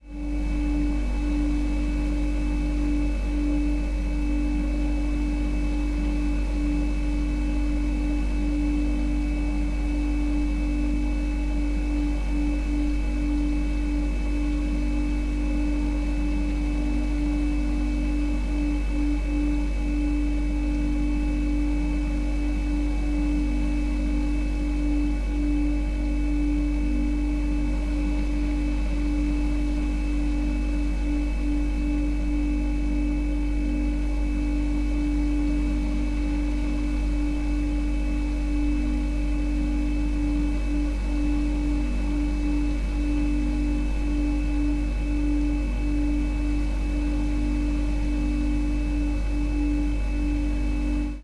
Fridge Hum
electrical, humming